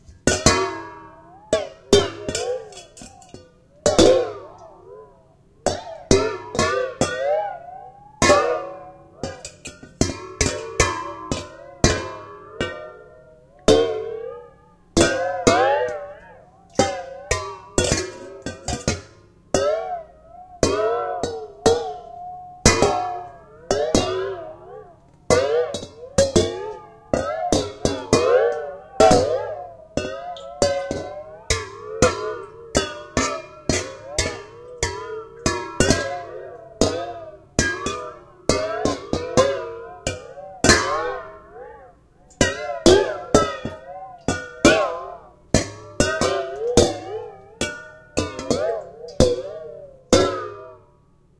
Two stainless steel bowls of different sizes were partially filled with water and knocked around to produce watery, springy, boingy sounds. Recorded in stereo using a Zoom H2 digital recorder. The sounds have been reversed in this file.